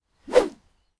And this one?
Bamboo Swing, A1
Raw audio of me swinging bamboo close to the recorder. I originally recorded these for use in a video game. The 'A' swings are generic, quick swings.
An example of how you might credit is by putting this in the description/credits:
And for more awesome sounds, do please check out my sound libraries.
The sound was recorded using a "H1 Zoom recorder" on 18th February 2017.